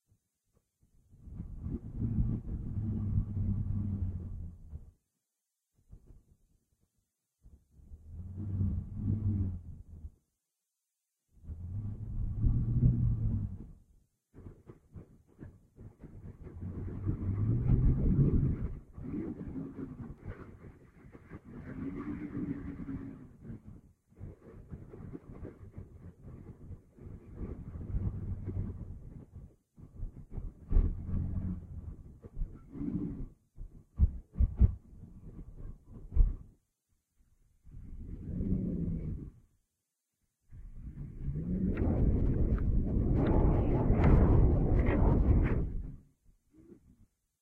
Wind Howling1
Wind howling on a windy eastern cost night. Yes, it contains lots of classic whistles and howls!